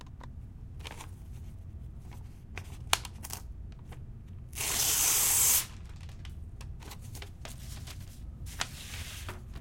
Paper Rip
Ripping a piece of paper
paper, rip, ripping